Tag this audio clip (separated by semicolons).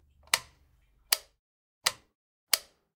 flick; flip; switching